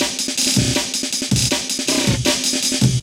GlitchFinalBreak Haine
Another break from the famous Amen Break